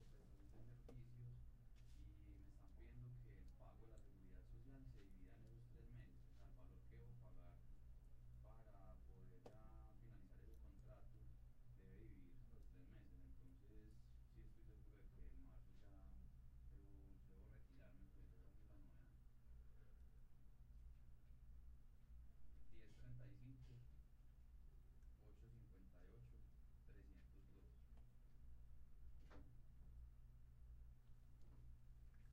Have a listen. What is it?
Great for avoiding digital silence.
silence, whispers, foley